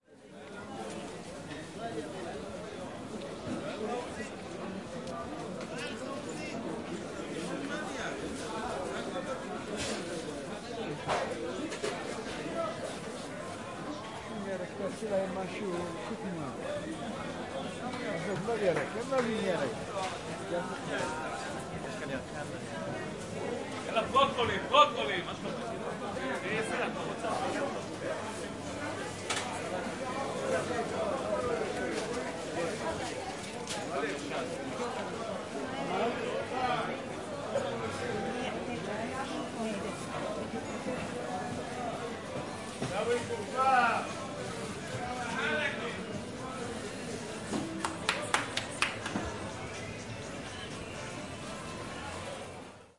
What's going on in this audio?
Carmel Market
Walking in the Carmel open Market in Tel Aviv. People shouting, clapping hands, some music from tape recorders. Recorded using Zoom H4N